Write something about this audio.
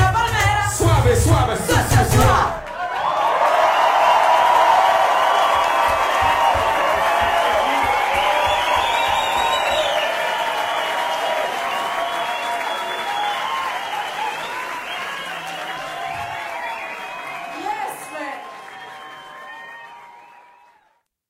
crowd roar
Us at the Concorde 2
field-recording, applause, roar, group, cheer, shout, applaud, audience, crowd